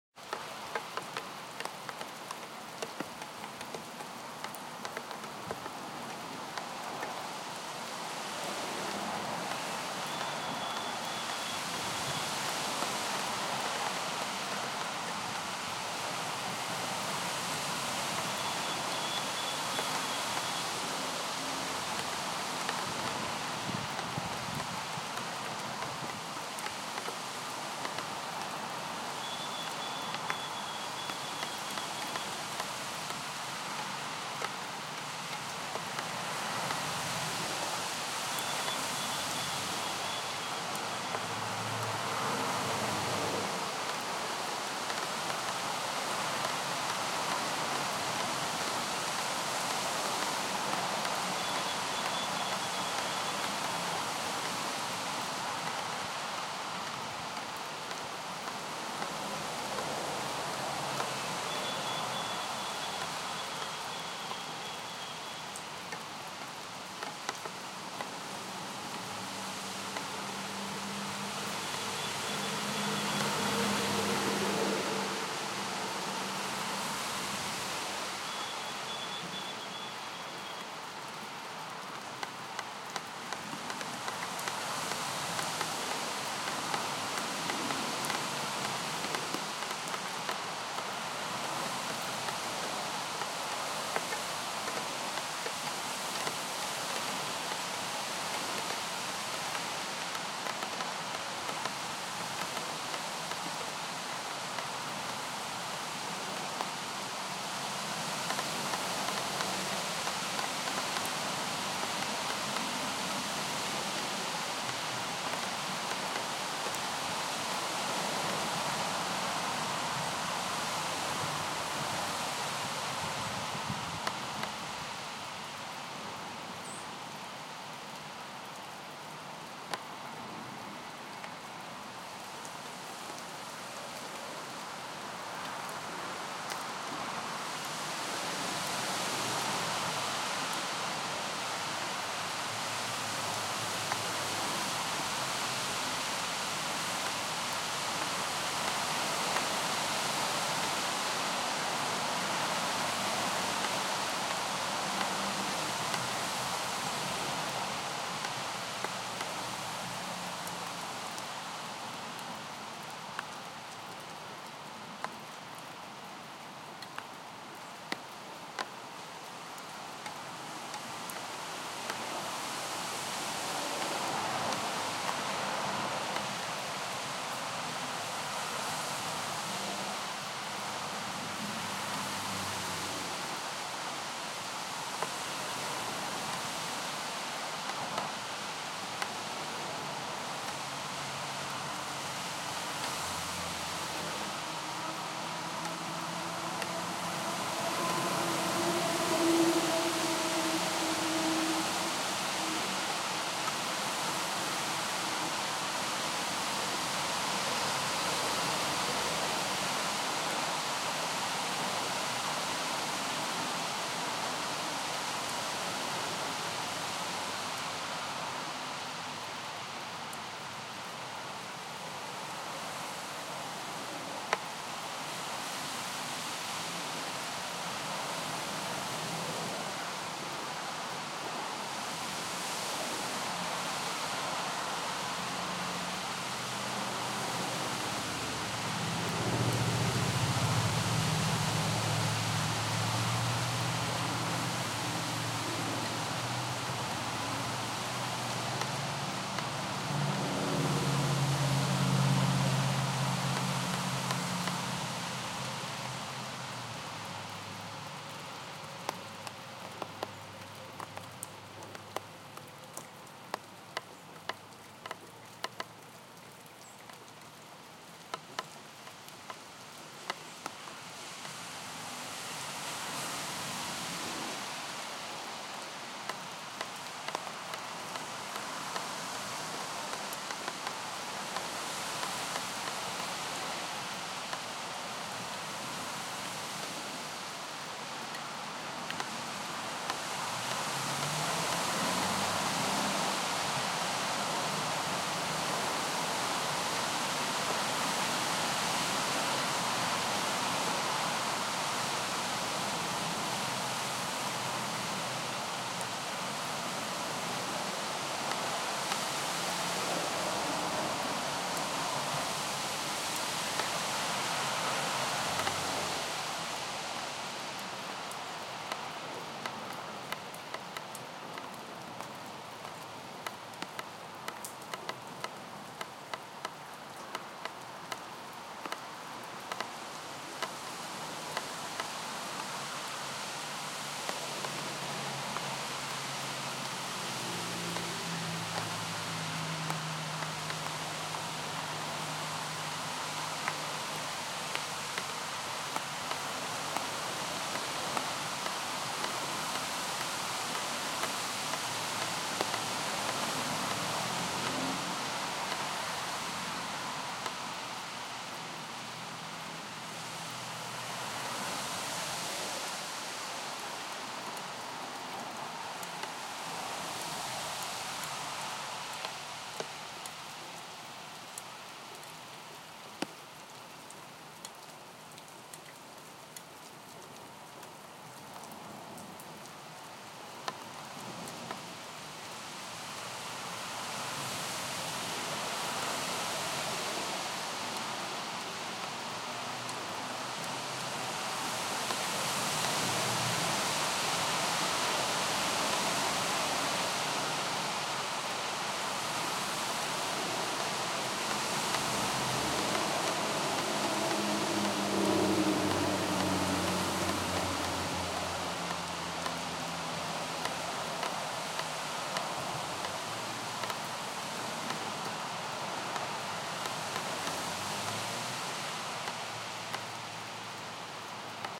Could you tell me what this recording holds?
Rain - Busy Suburban Street During Rush-Hour 2
Recorded out an open window of a small car parked 15-20 yards from a main 2-way 4-lane road during evening rush hour. There are stop lights in either direction causing the traffic to come and go at regular intervals. Rain can be heard outside on the street and against the cars roof and windshield.
Suburban, Road, City, Rush-Hour, Street, During, Traffic, Busy, Rain, Cars